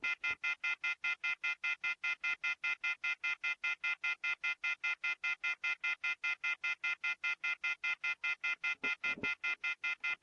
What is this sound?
The 'off charger' warning tone from a portable phone
beep, charger, off, pulse, tone, warning